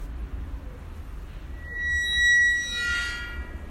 The creaking of a gate at nighttime. Made using a gate. And terror.